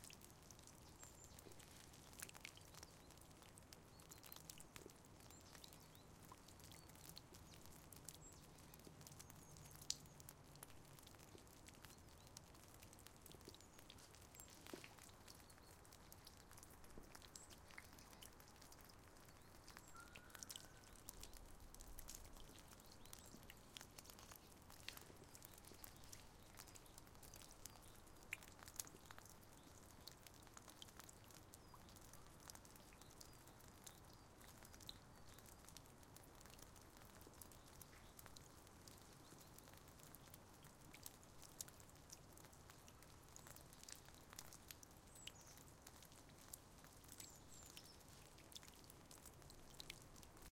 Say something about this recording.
Water drops from the roof some birds
Water is dropping from the roof. In the background some birds.
field-recording, drops, birds, dropping, water, ambience, drop